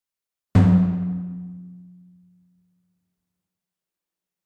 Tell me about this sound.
Davul(Greek ethnic instrument) Beat Recorded in Delta Studios. Double Beat.
Effect used: Organ reflect plus compressor